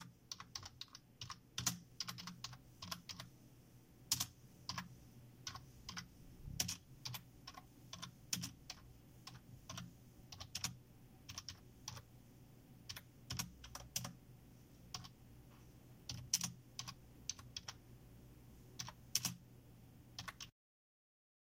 Sonido #10 - Teclado
Teclado beat field-recording pc teclado keyboard loop ambient noise typing sound
typing, ambient, sound, noise, keyboard, loop, pc